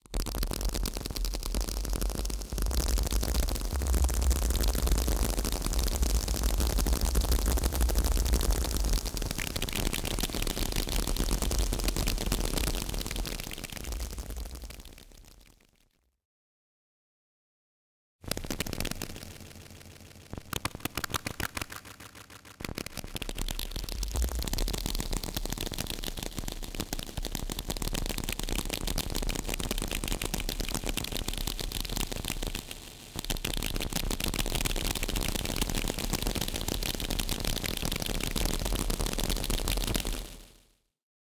Some kind of popcorn or plastic balls sound, poping atmo.